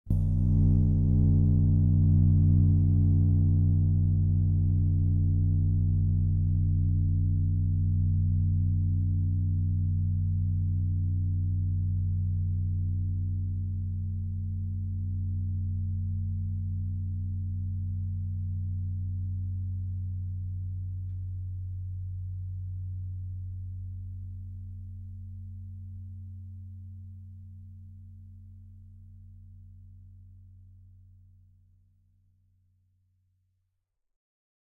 Beautiful metallic textures made by recording the sustain sound of a cymbal after it has been it. Recorded in XY-Stereo with Rode NT4 and Zoom H4 Handy Recorder.